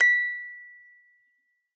clean ci 5
eliasheunincks musicbox-samplepack, i just cleaned it. sounds less organic now.
clean, metal, musicbox, note, sample, toy